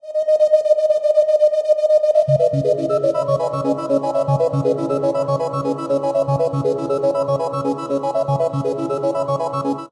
wqarp01rev
120bpm arpeggiated loop. Made on a Waldorf Q rack.
120bpm, arpeggiated, synthesizer, waldorf